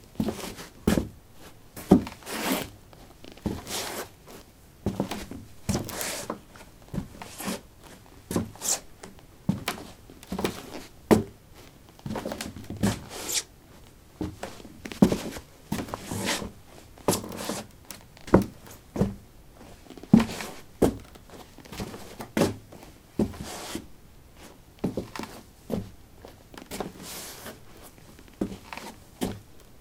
wood 15b darkshoes shuffle
Shuffling on a wooden floor: dark shoes. Recorded with a ZOOM H2 in a basement of a house: a large wooden table placed on a carpet over concrete. Normalized with Audacity.
footstep, footsteps, step, steps